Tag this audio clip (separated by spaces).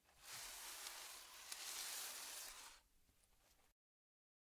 sliding sand cover dig quick-sand pouring pour